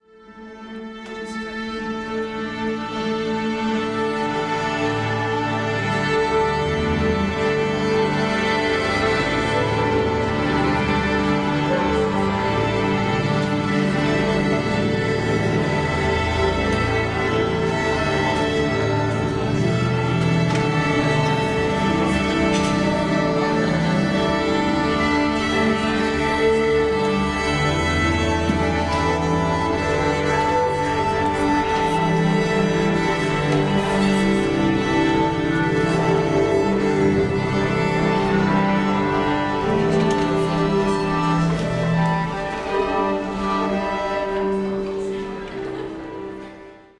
symphony tune up.. Sennheiser Shotgun Mic.
orchestra, tune, symphony, up